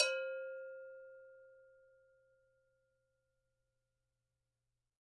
gbell 5-1 ff
recordings of 9 ghanaian double bells. Bells are arranged in rising pitch of the bottom bell (from _1 to _9); bottom bell is mared -1 and upper bell marked -2. Dynamic are indicated as pp (very soft, with soft marimba mallet) to ff (loud, with wooden stick)